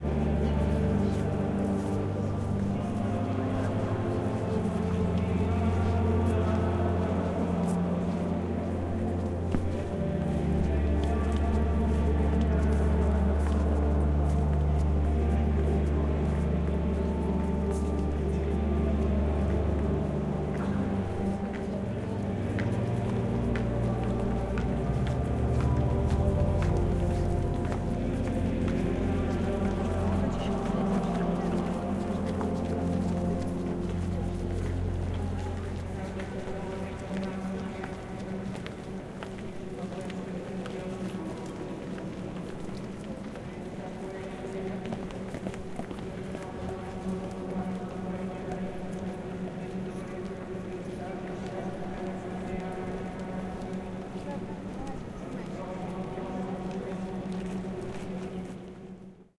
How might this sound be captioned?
St Peter Basilica 01
Recording walla and people and reverb in St. Peter’s Basilica in Vatican City. About halfway between the altar and the main doors, pointed at the altar.
Recorded on 26 June 2011 with a Zoom H4. No processing.
reverb, basilica, walla, vatican, footsteps, peter, church, st, music, people, rome, catholic